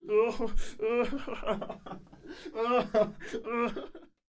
Risas más intensas
laughing, laughs, people, intense